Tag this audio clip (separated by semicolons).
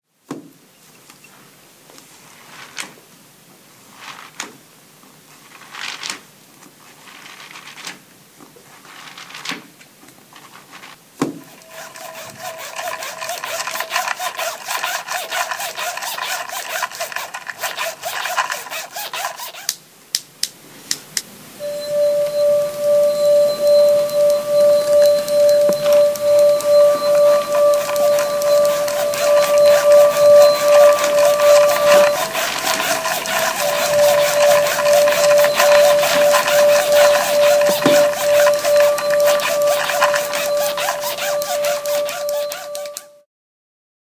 Belgium; Brussels; Jans; Molenbeek; Regenboog; Sint; Soundscape